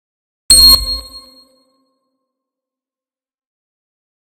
percursive, high, processed, cinematic, granular, pvoc, soundhack, ableton, maxmsp, resampling
granular, maxmsp, percursive, resampling, soundhack